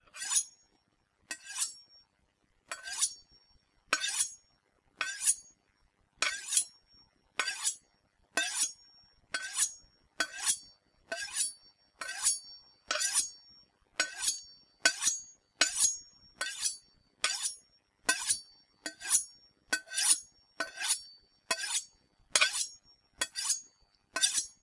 Sharpening a metal knife with a metal sharpener
Knife, Sharpen